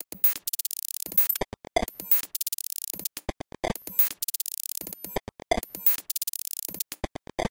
8 seconds of my own beats processed through the excellent LiveCut plug-in by smatelectronix ! Average BPM = 130
beat, glitch, hihat, idm, livecut, loop, processed